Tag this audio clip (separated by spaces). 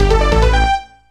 jingle complete melody game level-complete short level finished